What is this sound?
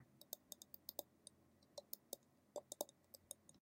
Shiver Cowboy
A cowboy that has gotten scared and is now shivering.
shiver, teeth, bone